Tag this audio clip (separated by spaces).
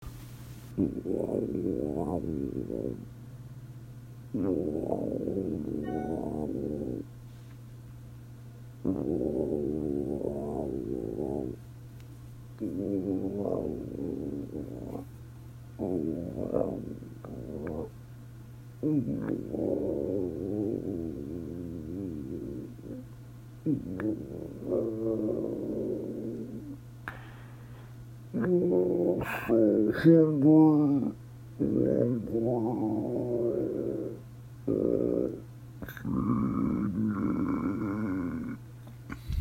gurgle hungry pains stomach